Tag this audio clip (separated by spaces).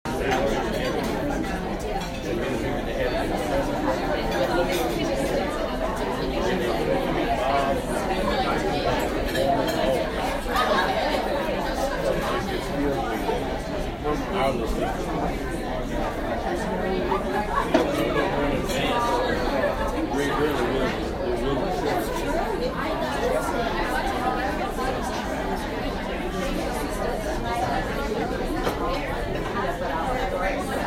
ambiance ambience ambient-sound busy-cafe cafe chatter clattering-dishes conversation diner noise plates restaurant voices